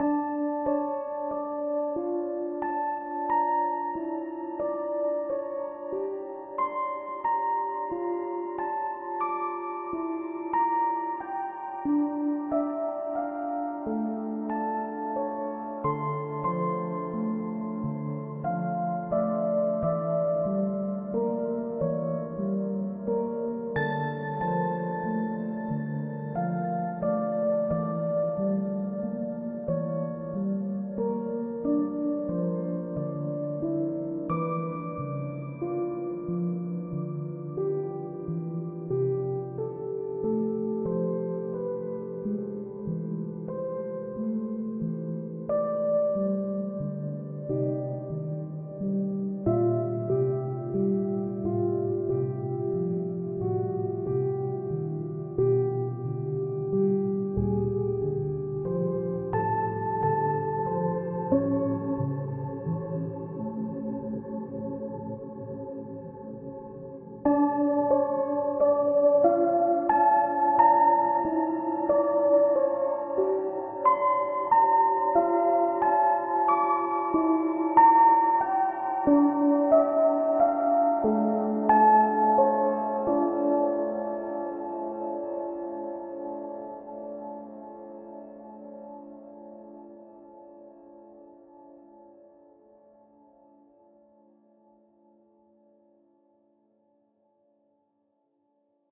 creepy score music - mozart - lacrimosa
I found the Midi's for Lacrimosa by Mozart and decided to make my own version of it, without compromising the integrity of the original.
Take a little peek. It's without a doubt, my favorite piece written by Mozart. <3